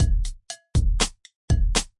Short Hip Hop Loop With a Bit Of Bounce. 120 bpm.